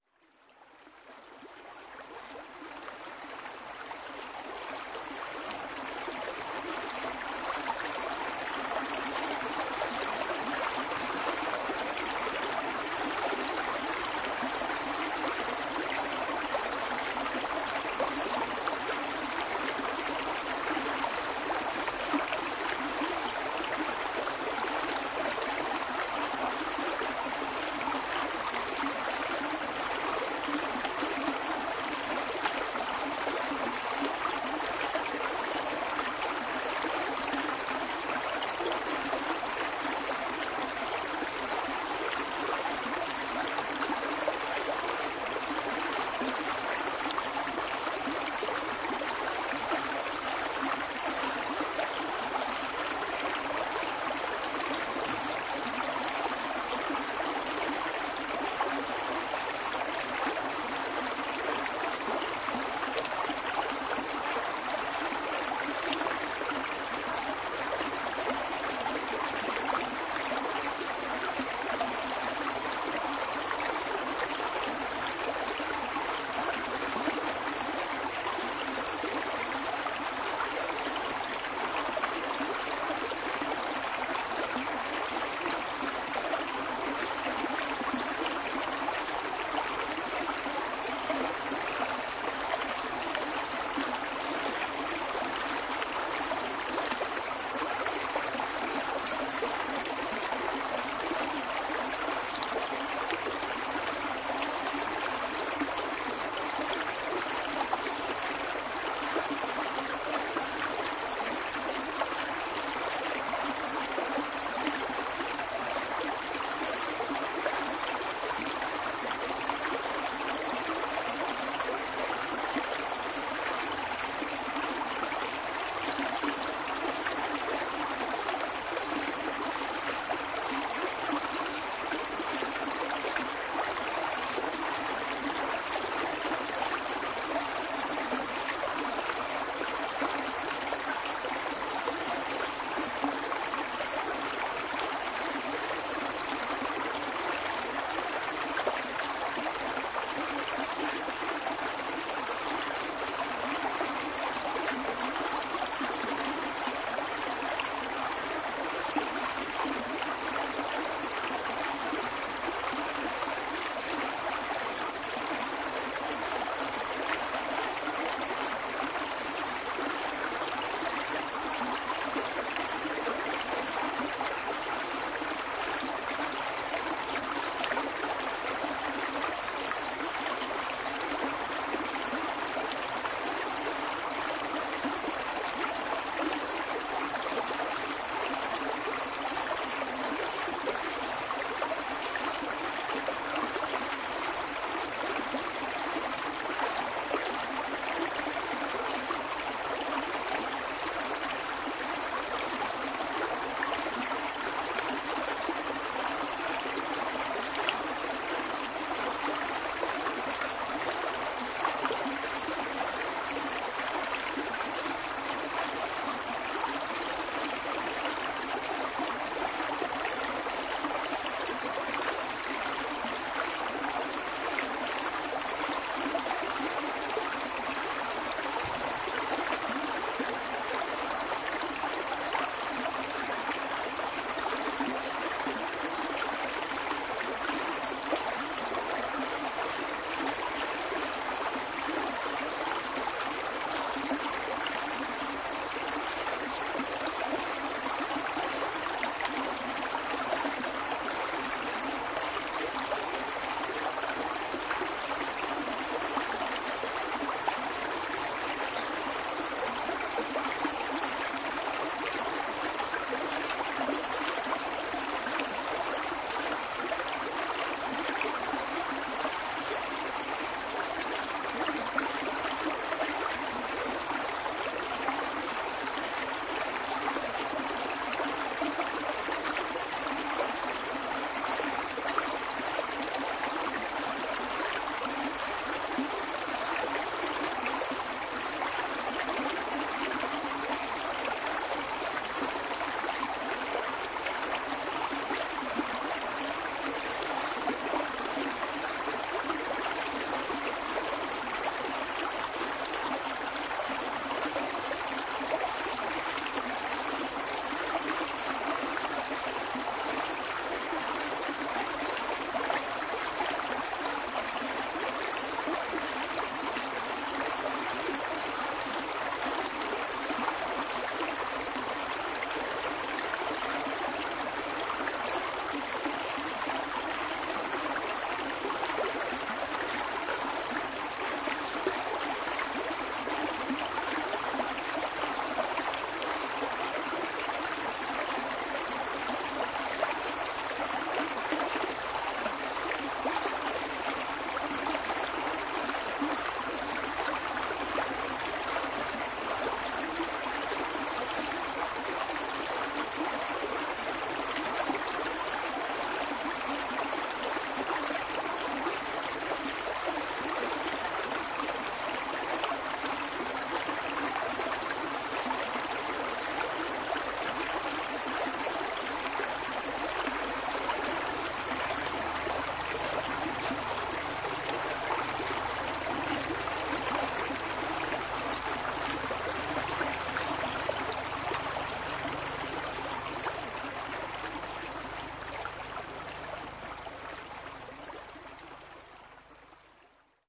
Stream Wyre forest 8.1.14
The sound of a small woodland stream recorded in the english midlands, Wyre Forest.
flowing, babbling, water, brook, shallow, splash, relaxation, gurgle, nature, flow, forest